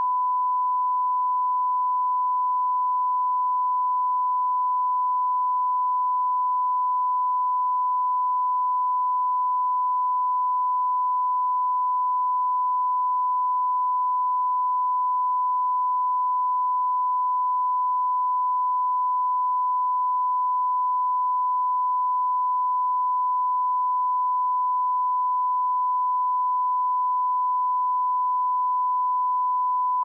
1kHz @ -18dBFS 30 Second
a 1000Hz -18dBFS 30 second line up tone
UK
HZ, LINE, UK, UP, 30, SECOND, K, TONE, 1